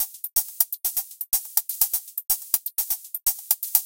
track12 nokick
part of kicks set